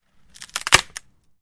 Snapping, Wooden Fence, U
Raw audio of snapping a wooden fence panel. I needed to get rid of an old fence, so might as well get some use out of it.
An example of how you might credit is by putting this in the description/credits:
And for similar sounds, do please check out the full library I created or my SFX store.
The sound was recorded using a "H1 Zoom V2 recorder" on 21st July 2016.